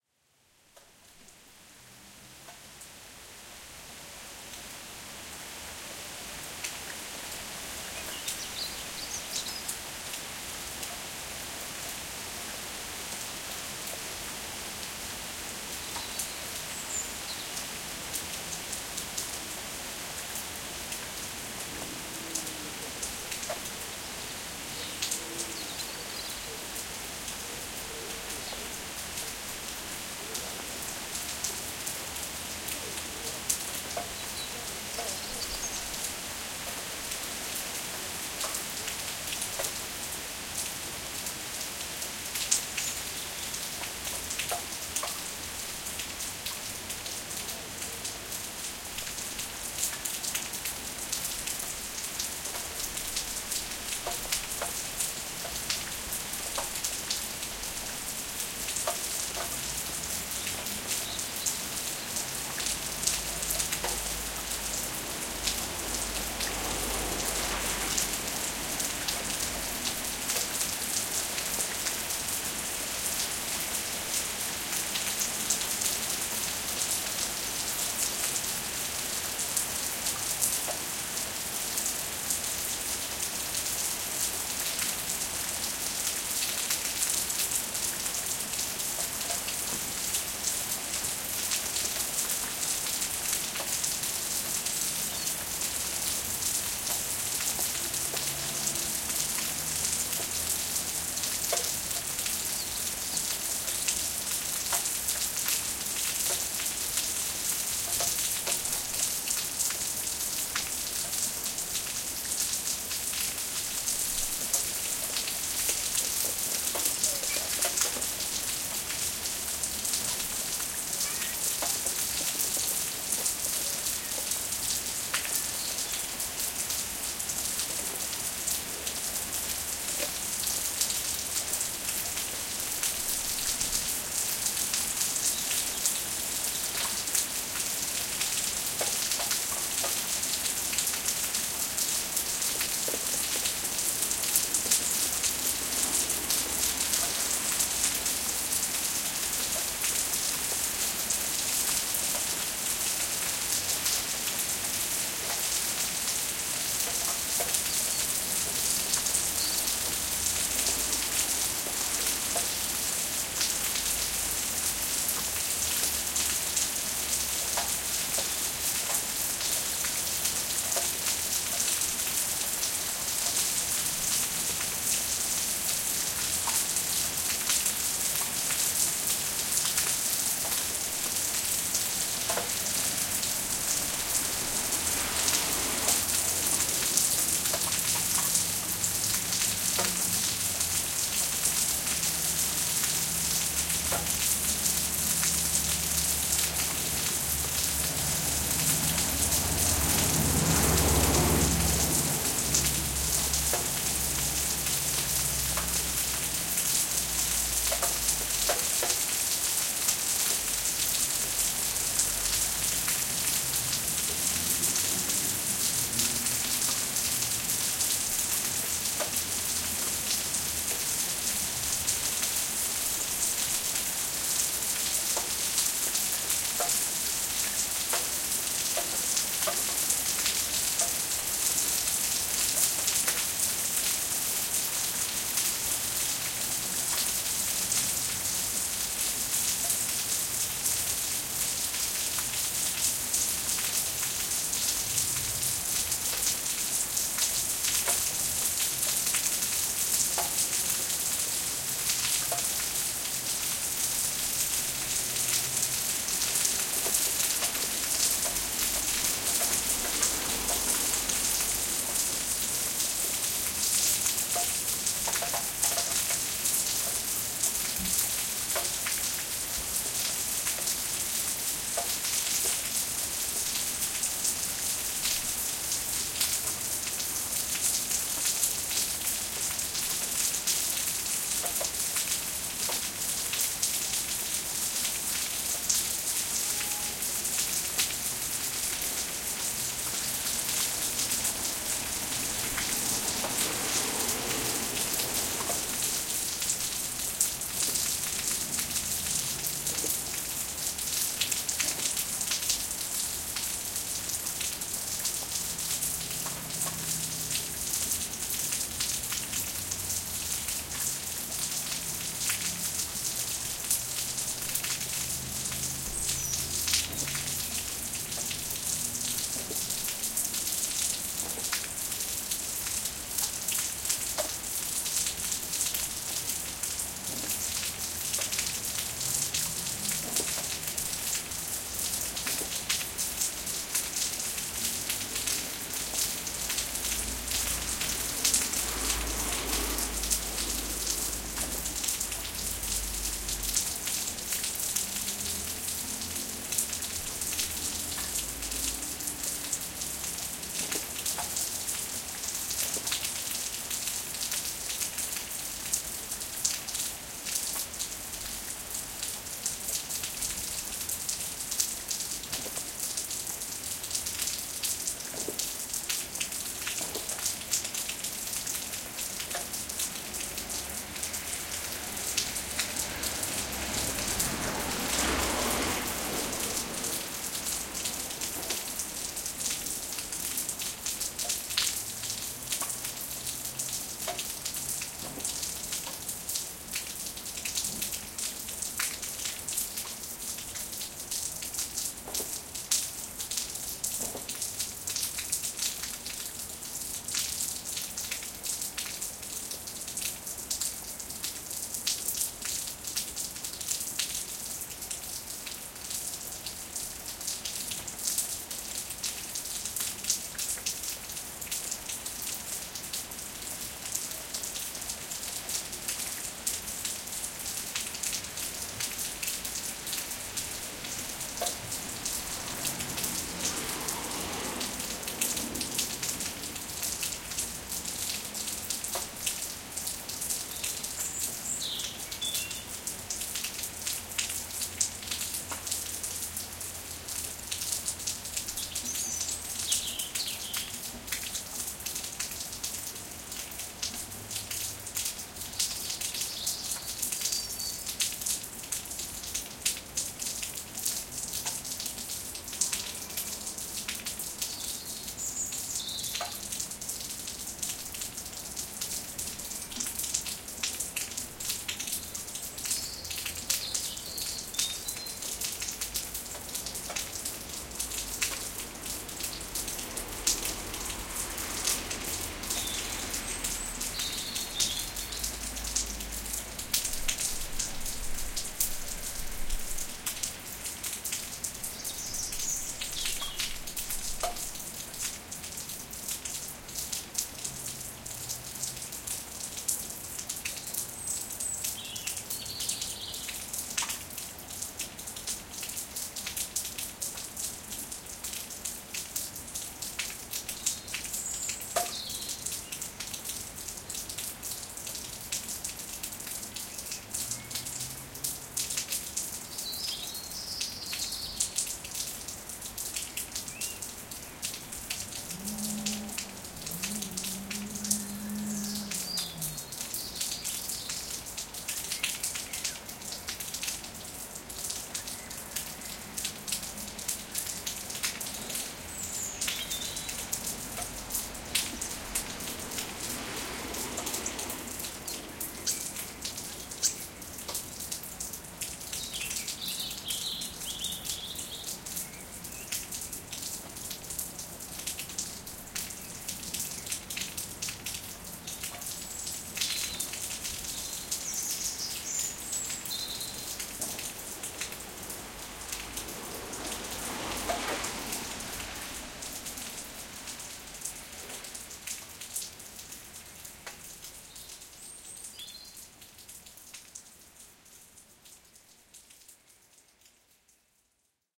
AMB Rain 4824 Se5SP 01
General ambience from a window on a rainy day.
Captured on Se5 matched stereo pair into a Sound Devices 552.
Feline vocals courtesy of Moksha.